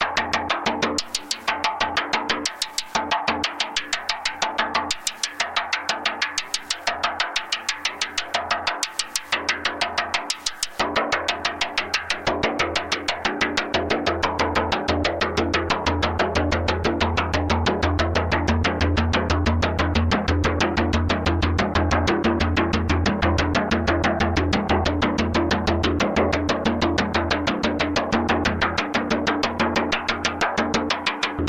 A sound sequence captured from different points of my physical model and different axes. Some post-processing (dynamic compression) may present.
synthesis, finite-element-method, weird